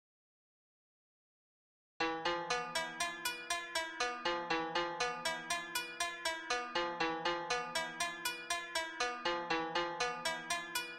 Monochord - arpeggio V3
Homemade monochord tuned to a diatonic scale
Recorded using Reaper and Rode NT1000 microphone
arpeggio, diatonic, harmonic, medieval, melodic, monochord, pythagorus, relax, relaxing, scale, solfeggio, therapeutic, therapy, wooden